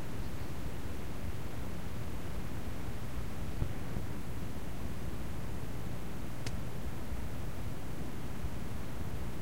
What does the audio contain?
Tape hiss from a cassette tape.
Digitized using an optimus tape deck hooked up to a computer.
tape-hiss, cassette